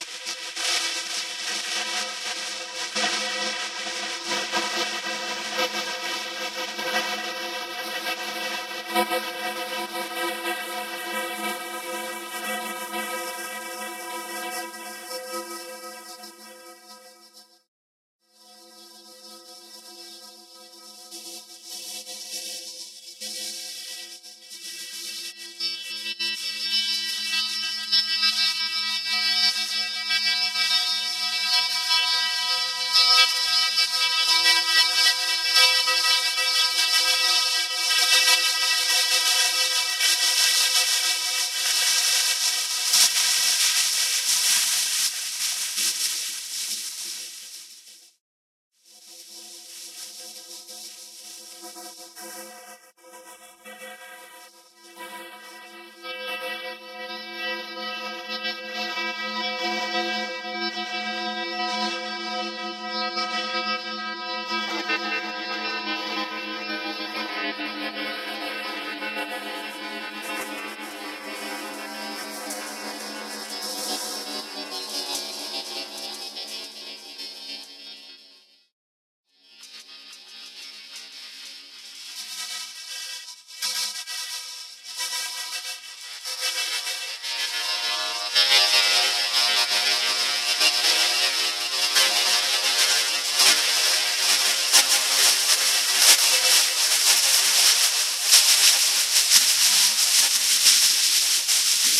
pipe resonator reverb granular-synthesis processed-sound sound-effect